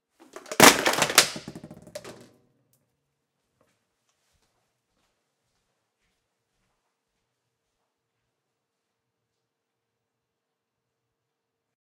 Can drop foley
Multiple food cans being dropped
can, dead-season, tins, foley